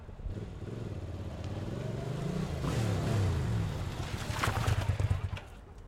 Ducati monster 3
DUCATI MONSTER
start, break
ntg3_zoom h4n
start, motorcycle, motorbike, motor, monster, engine, breaking, ducati, bike